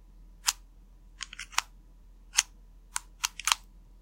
Cable Connection
Plugging in a cable.